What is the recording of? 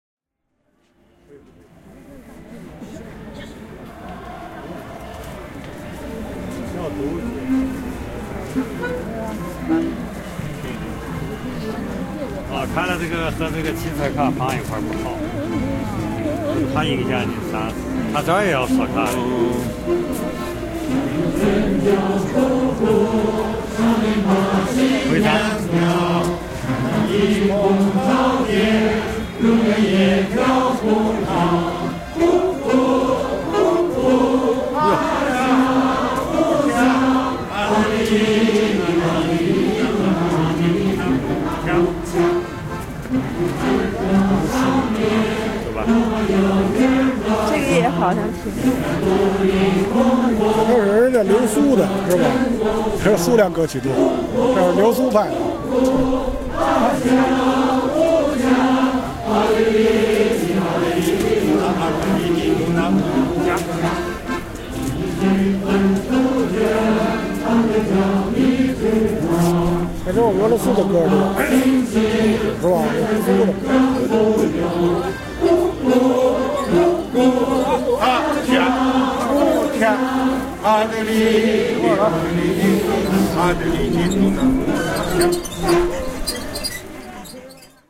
China Singing 2
Old folks singing to their hearts' content in Beijing Central Park. One guy playing the accordion and about 30 people singing in a group.